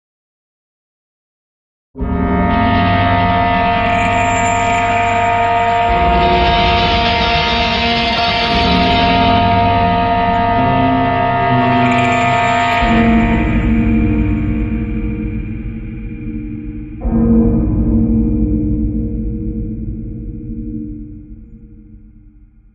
14 second sample created in logic using some audio and audio instruments.
dischord, disonant, evil, horror, piano, scary, tension